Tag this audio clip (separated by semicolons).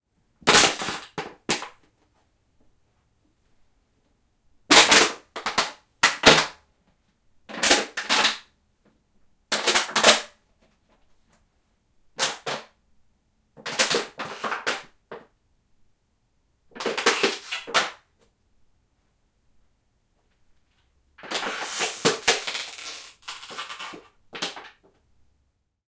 crush; crunch; rustle; plastic; movement; bottle; mic; Foley; sound-design; microphone; field-recording